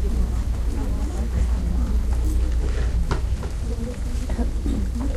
Snippets of sound in between the coming attractions and commercials inside a movie theater.